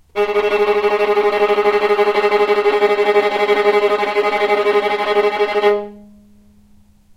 violin tremolo G#2
tremolo, violin